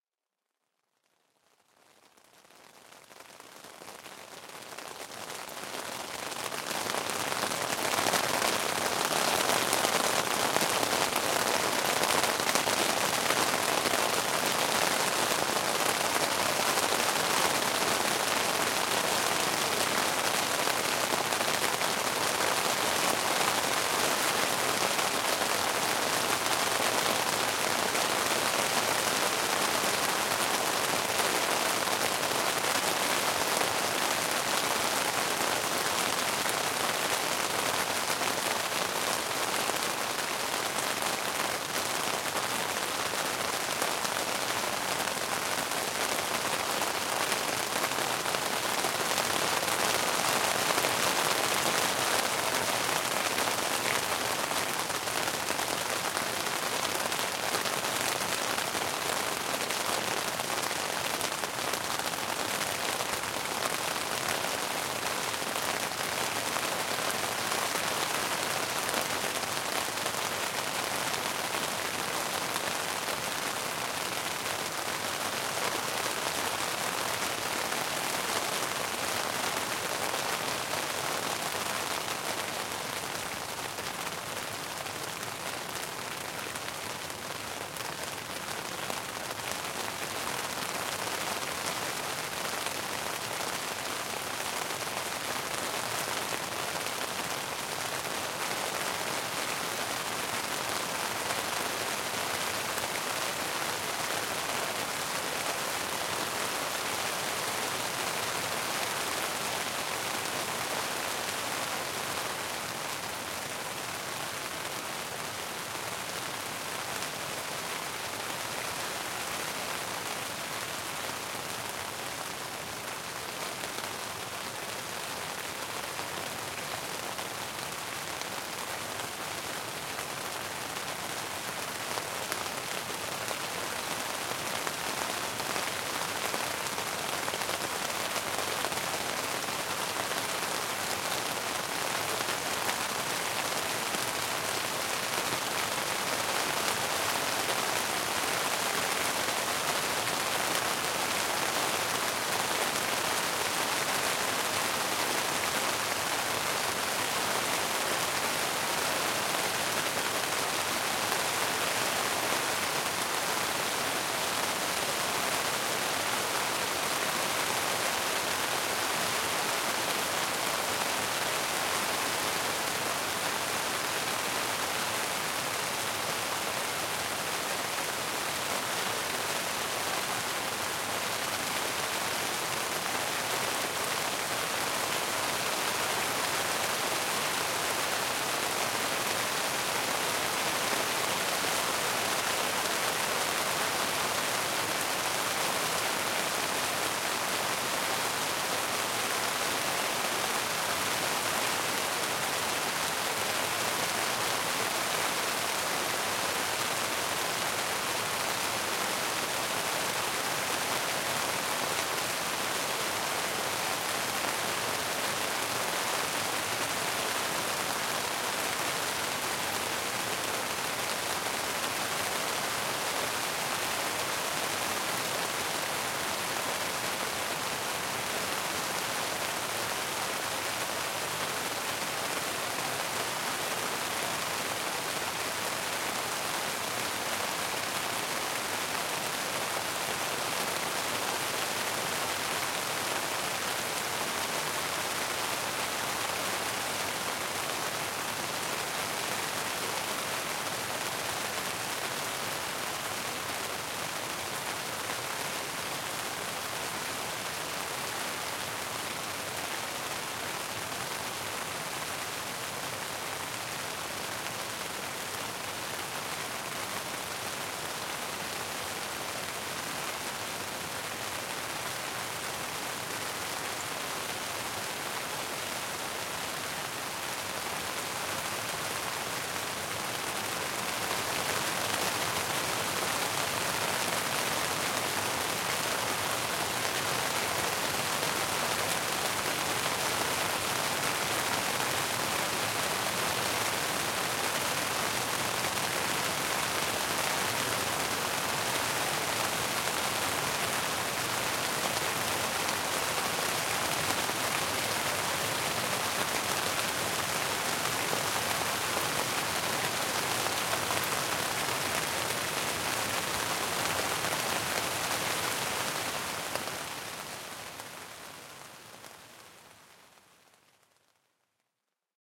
Trapped in the tent during some inclement weather. Lots of changes in rain intensity / drop size.
water, rain, weather, camping, storm